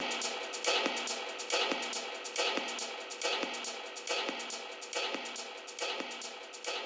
Space Tunnel 9
beat, dance, electronica, loop, processed